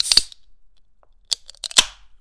Opan Soada can extra long
Drink, soda